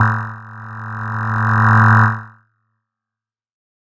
This is one of a multisapled pack.
The samples are every semitone for 2 octaves.
noise, pad, swell, tech